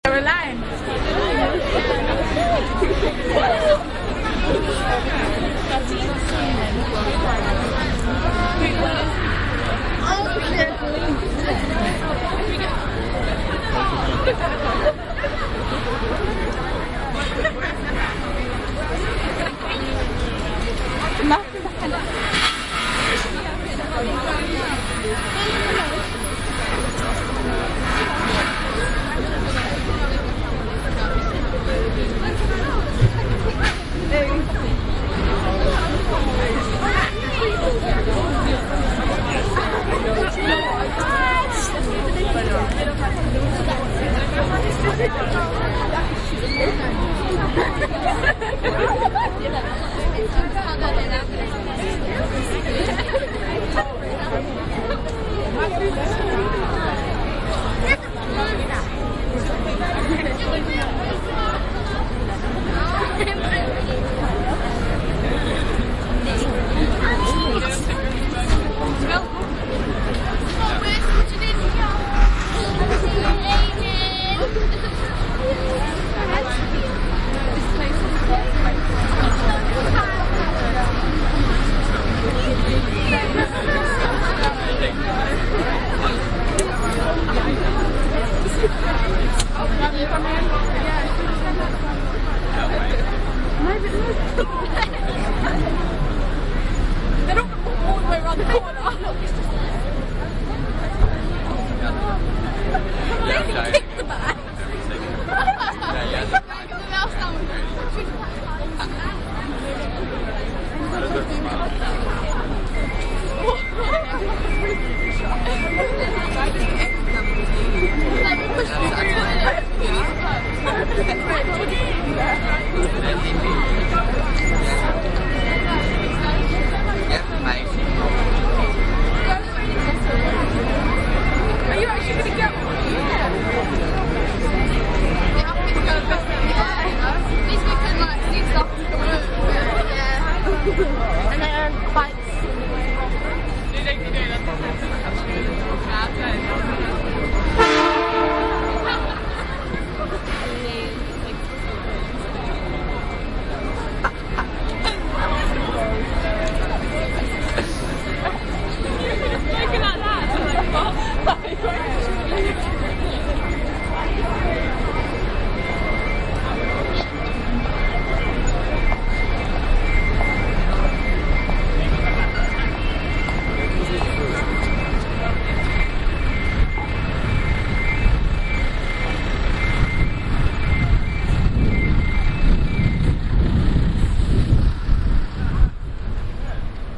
Leciester Sq, film premier crowd '17 Again'
ambiance, ambience, ambient, atmosphere, background-sound, city, field-recording, general-noise, london, soundscape